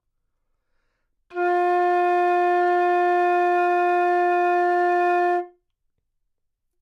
Part of the Good-sounds dataset of monophonic instrumental sounds.
instrument::flute
note::F
octave::4
midi note::53
good-sounds-id::3027
F4, good-sounds, neumann-U87, single-note, multisample, flute